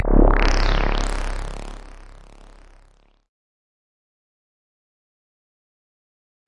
MA SFX MiscPack 1 6
Sound from pack: "Mobile Arcade"
100% FREE!
200 HQ SFX, and loops.
Best used for match3, platformer, runners.
future, noise, abstract, effect, glitch, loop, lo-fi, fx, soundeffect, free-music, electronic, sfx, electric, game-sfx, digital, sci-fi, freaky, machine, sound-design